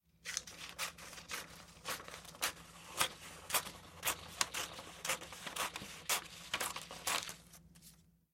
Tijeras corta papel
cortar, Papel, tijeras